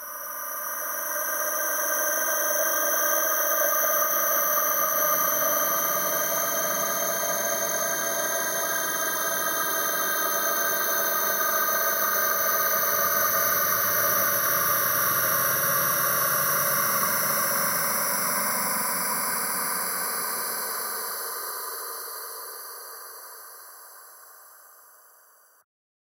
Chitter ambiance5
While working on the Creature in da cave sound effects, I somehow came up with this. At fist I thought it wouldn't turn out so well, but when I herd it, I said to myself THIS IS AWESOME!!! And then I new I had created something wonderful. This sample is PERFECT for tense moments in haunted houses, and sneaking around in alien ship corriders. Enjoy!!
ambiance, chitter, creepy, drama, horror, shiver, spine, spine-tingling, tense, tingling